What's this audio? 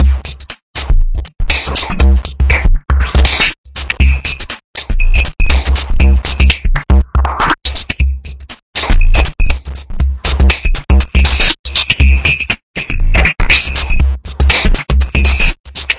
DestructoBreak4 LC 120bpm
breakbeat, distorted